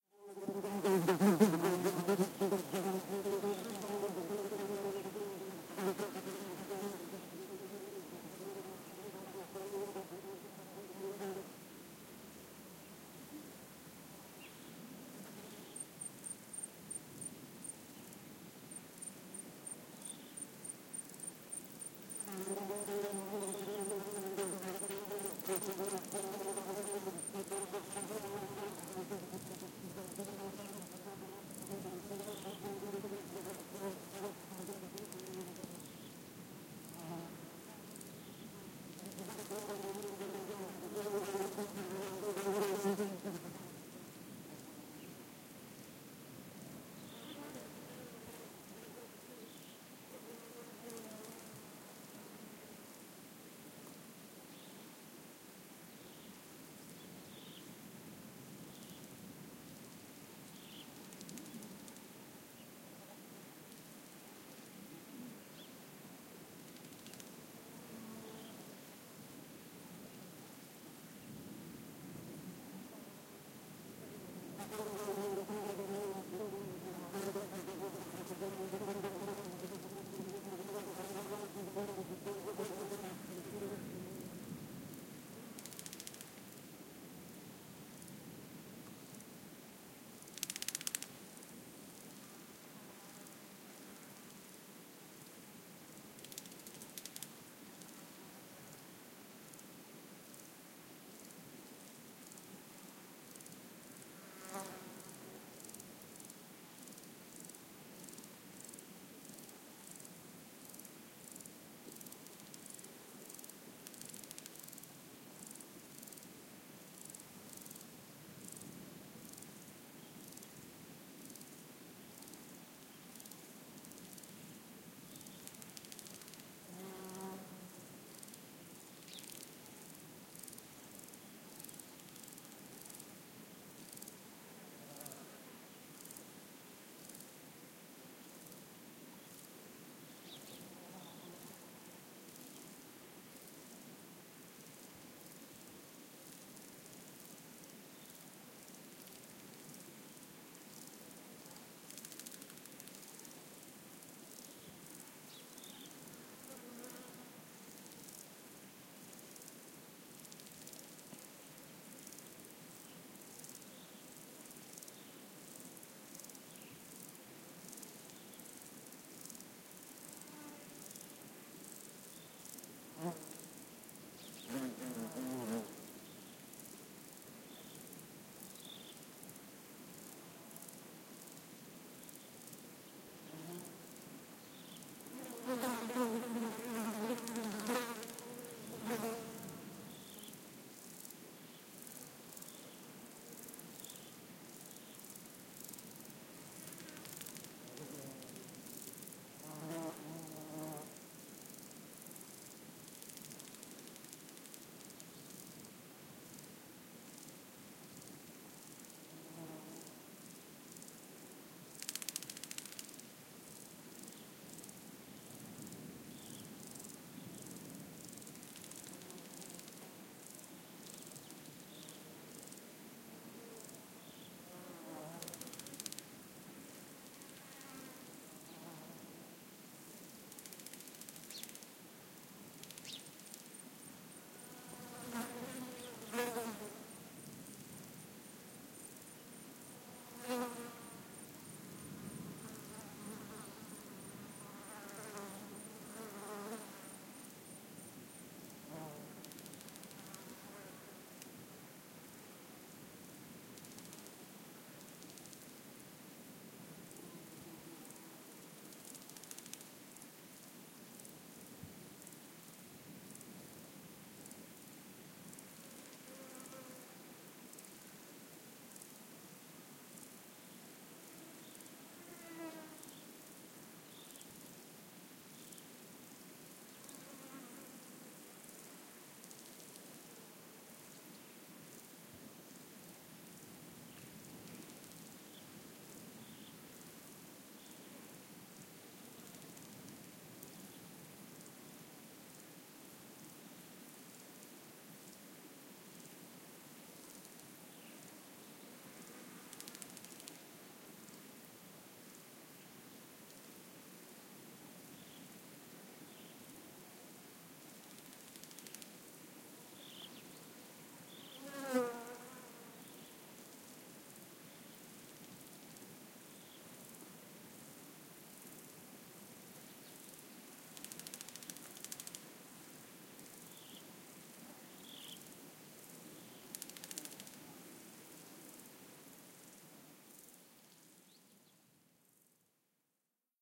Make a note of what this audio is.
Insects soundscape in a rocky landscape. 35°C, 3PM, stony hill. Flys and different kind of crickets. Around Biskra, Algeria, spring 2014
Recorded with Schoeps AB ORTF in Albert Cinela
Recorded on Sounddevice 744T,
Smooth Noise reduced by Isotope